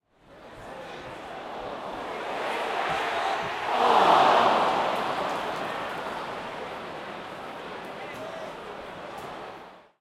Football Crowd - 3 Near miss 2- Southampton Vs Hull at Saint Mary's Stadium
Recorded at Southampton FC Saint Mary's stadium. Southampton VS Hull. Mixture of oohs and cheers.